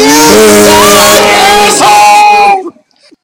Angry Crowd
These people sure are angry.
angry, audience, boo, booing, crowd, mad, outraged